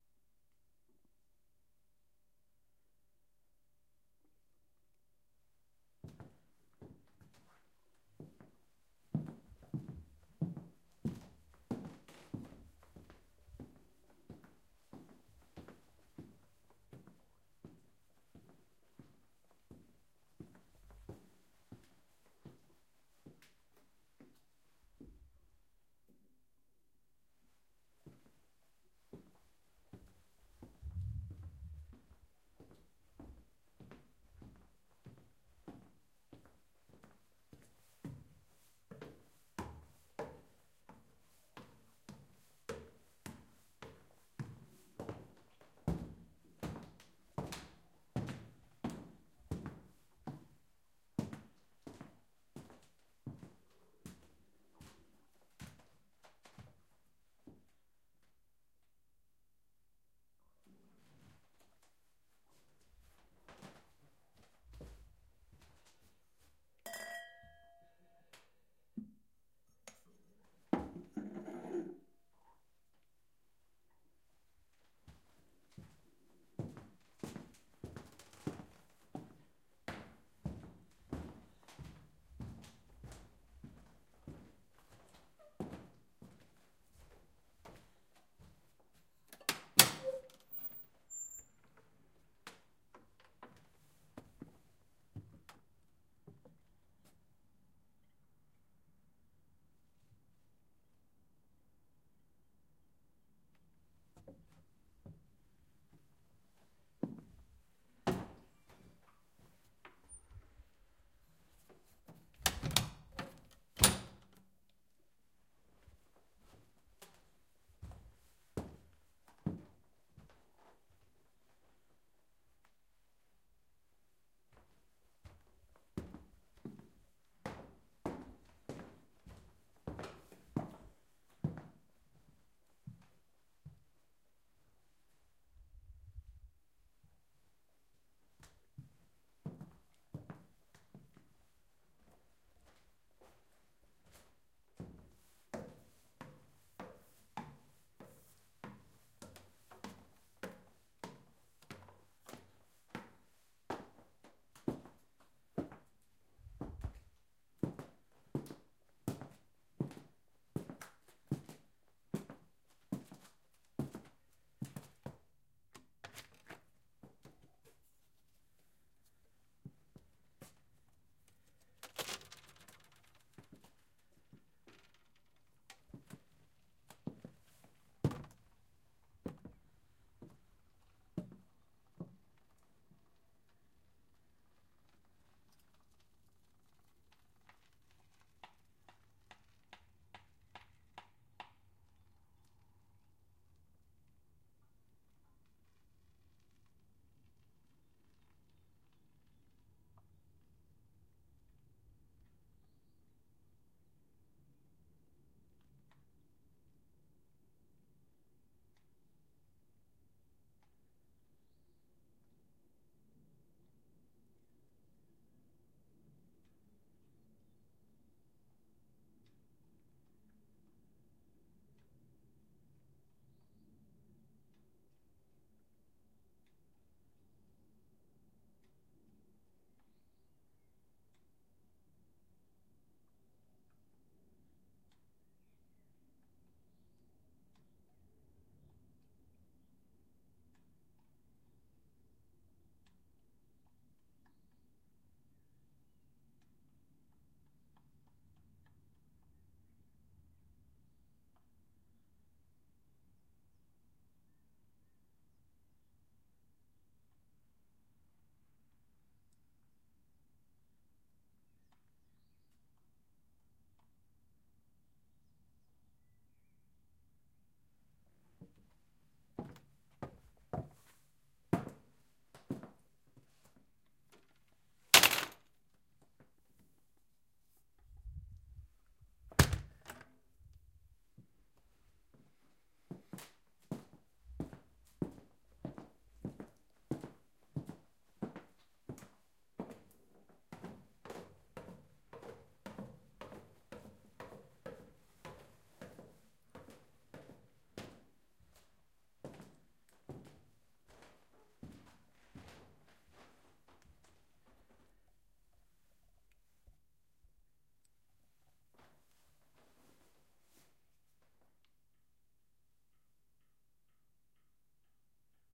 steps on wooden floor moving
Walking around in a beautiful bavarian farmhouse made from wood, holding the field recorder in hand. Walking up and down the stairs. Entering the balcony. Bicycle passing by. Someone in the distance is hammering a nail into wood.